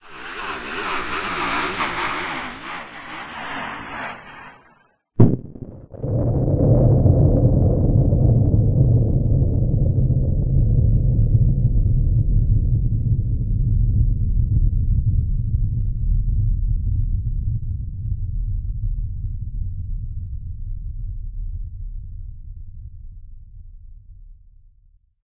A completely syntheticly generated atomic strike